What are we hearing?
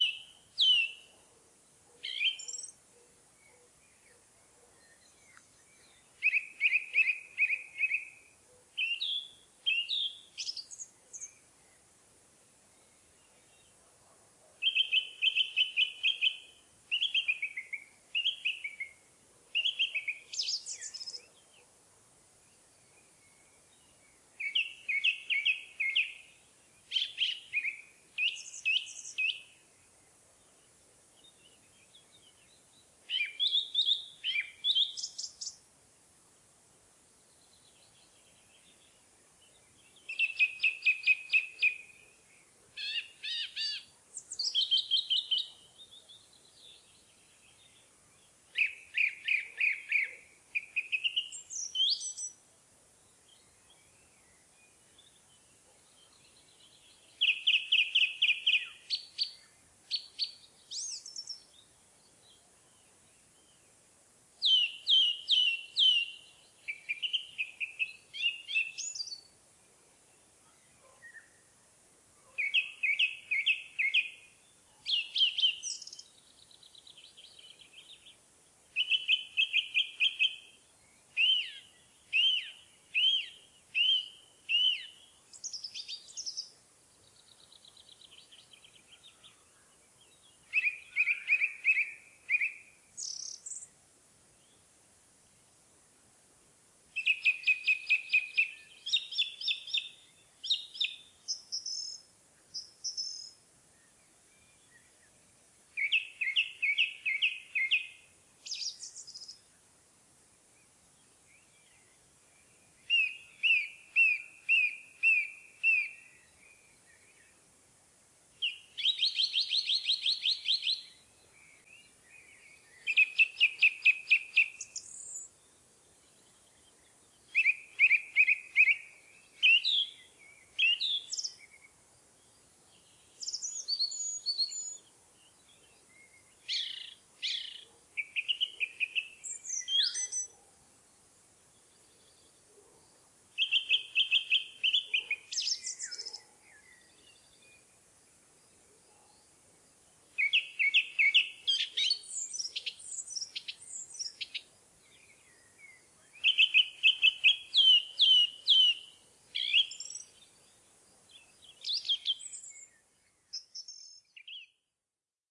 Trepador azul :: Eurasian Nuthatch
Trepador azul cantando en las montañas de Gredos.
Eurasian Nuthatch singing in the mountains of Gredos.
Grabado/recorded 13/06/14